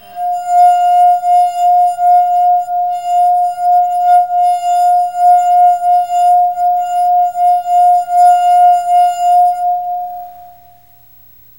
rim of wine glass played, root note F, stereo, recorded with Zoom H4n